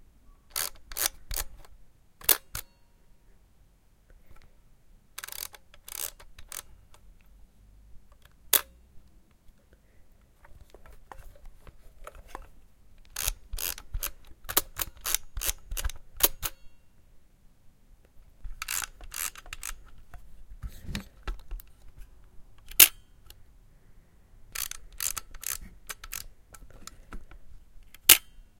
Camera Shutter Fire: 1960s family camera.
Me firing the shutter of a Olympus Trip 35.